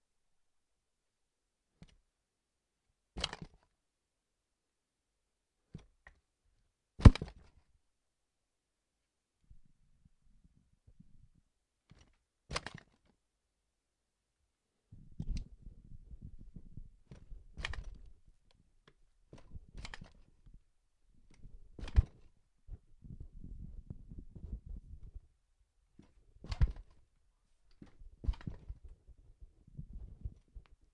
droping on chair
chair, falling, furniture, onto